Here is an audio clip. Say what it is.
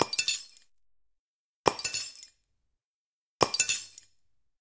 Exploding lightbulb 2
Dropping a tall lightbulb, popping on impact and breaking the glass.
Recorded with:
Zoom H4n on 90° XY Stereo setup
Zoom H4n op 120° XY Stereo setup
Octava MK-012 ORTF Stereo setup
The recordings are in this order.
gas, explosion, falling, plop, dropping, exploding, pop, explode, breaking, bulb, glass